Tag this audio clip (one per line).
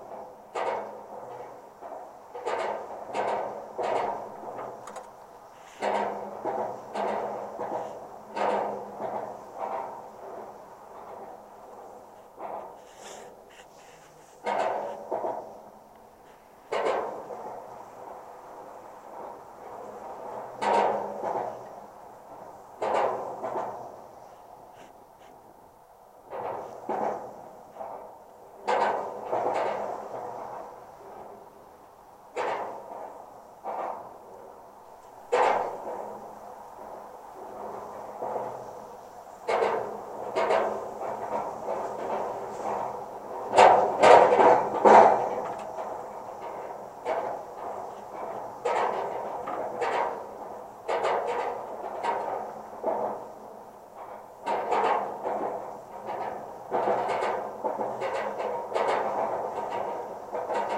bridge
cable
contact
contact-mic
DYN-E-SET
Golden-Gate-Bridge
metal
steel-plate
wikiGong